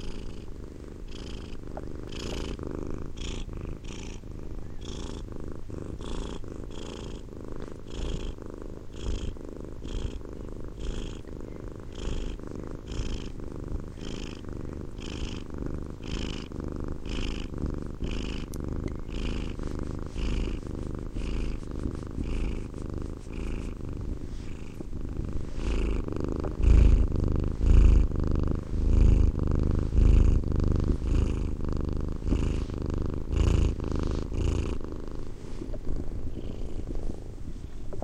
pucky spinnen 04
Our cat Puk/Pucky purrs loudly. A lengthy sample. Recorded with a Zoom H2 recorder.